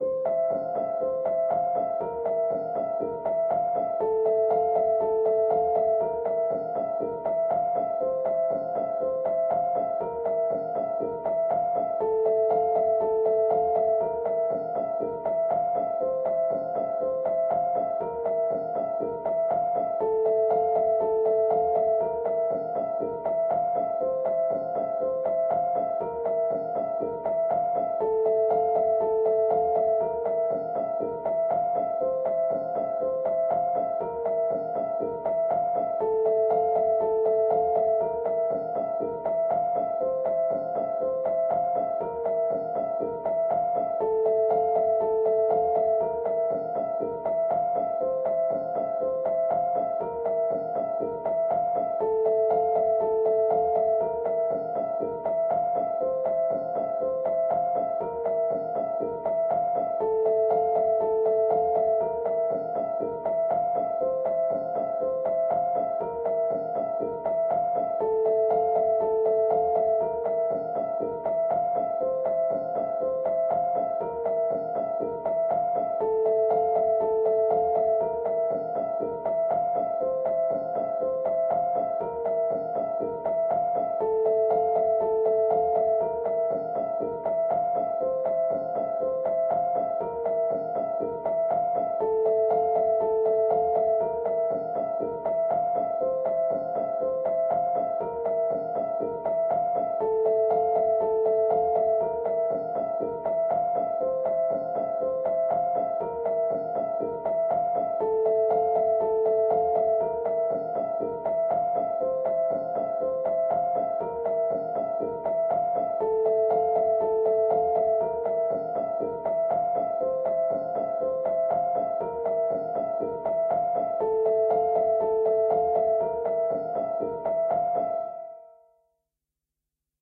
Piano loops 076 octave up long loop 120 bpm
120, 120bpm, bpm, free, loop, music, Piano, pianomusic, reverb, samples, simple, simplesamples